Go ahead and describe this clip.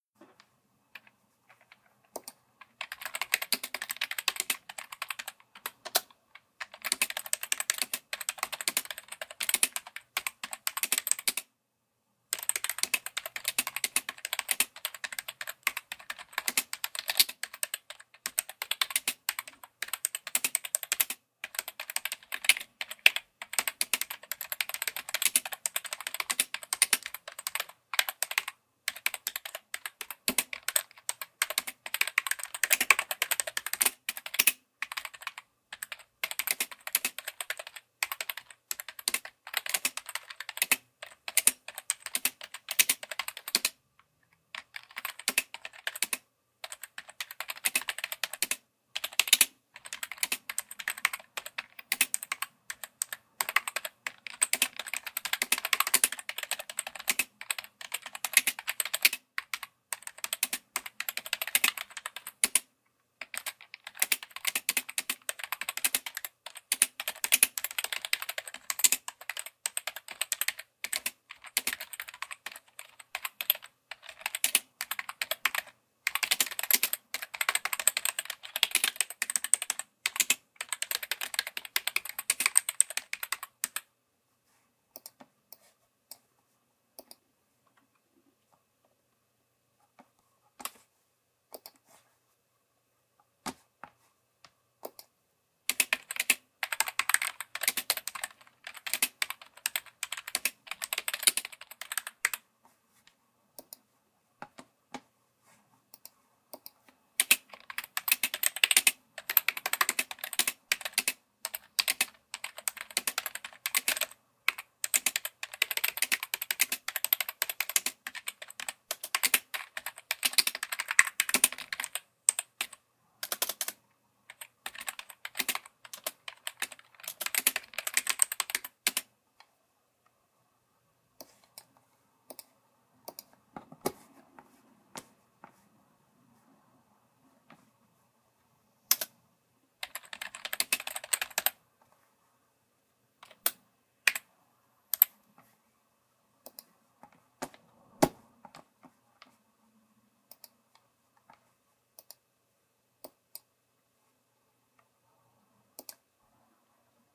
Few (realistic) clicks on a keyboard. Go ahead and do whatever you want with this.
key, keyboard, typing, type, computer, click, keys